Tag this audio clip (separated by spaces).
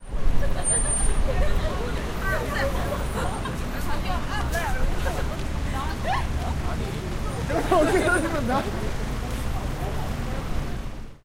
field-recording korea laugh seoul